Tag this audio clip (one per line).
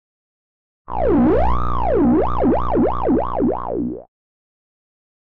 computer
glitch
weird